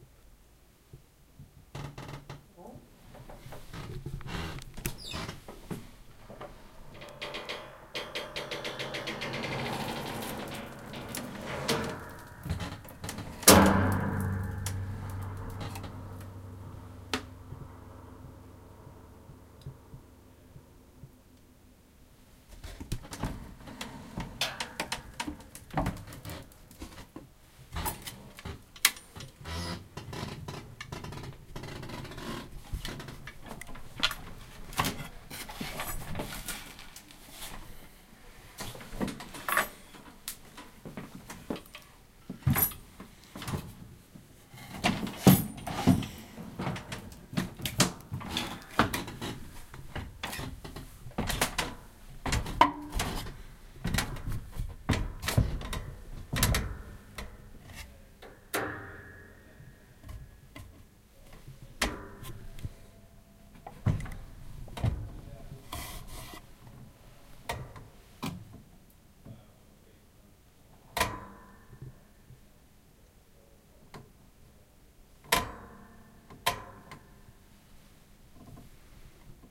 Attic Door Springs 3
The springs on this ceiling door were super metallic so I wanted to record them, sorry for any time I touch the mic!